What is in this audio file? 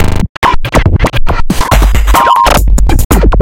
"glitch loop processed with plugins"